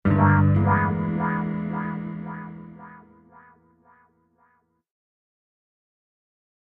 Guitar Sting 4
A short sting made in Logic Pro X.
I'd love to see it!
cartoon, short, transition, wah